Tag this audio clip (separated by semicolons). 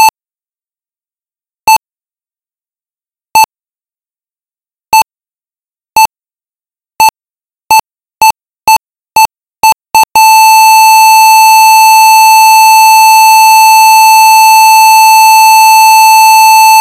hospital; bpm; heart; die; bipping